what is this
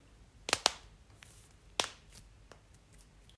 A recording of a person cracks g their fingers. This sound can be used for numerous things such as, rise crispies or a chair making noise.